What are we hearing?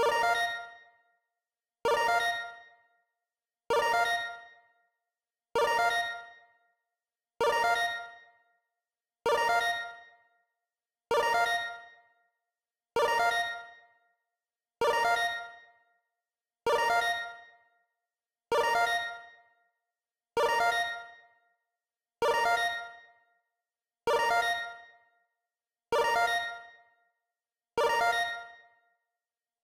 I make 8-bit games and thought I would contribute back to this site which has helped me in so many situations over the years.